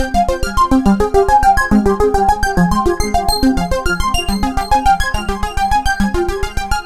trance pulse 140bpm
fast, delay